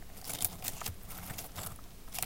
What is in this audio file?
Short potpourris rustling sound made by stirring a bowl of it